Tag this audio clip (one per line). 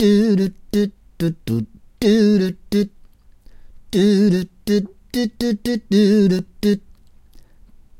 120bpm
Dare-19
beatbox
lead
loop
vocal-synth
vox-synth